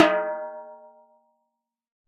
TT12x8-VHP-HdE-v12
A 1-shot sample taken of a 12-inch diameter, 8-inch deep tom-tom, recorded with an Equitek E100 close-mic and two
Peavey electret condenser microphones in an XY pair.
Notes for samples in this pack:
Tuning:
LP = Low Pitch
MP = Medium Pitch
HP = High Pitch
VHP = Very High Pitch
Playing style:
Hd = Head Strike
HdC = Head-Center Strike
HdE = Head-Edge Strike
RS = Rimshot (Simultaneous Head and Rim) Strike
Rm = Rim Strike
1-shot, multisample, tom, velocity